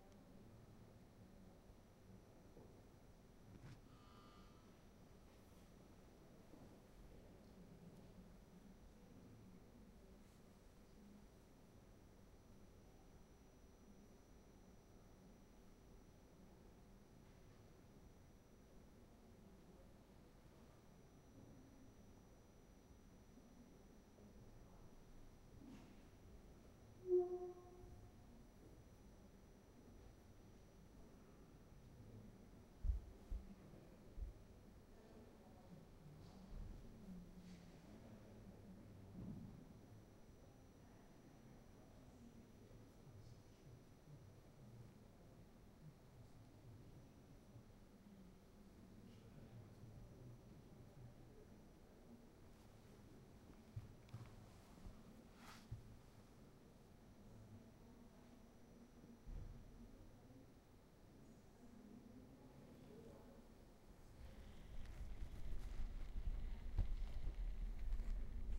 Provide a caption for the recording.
STE-005 wolfsburg turrell atmo
wolfsburg museum hall. fieldrecording with zoom h2. no postproduction.
ambiance, exhibtion, field, germany, james, museum, recording, turrell, wolfsburg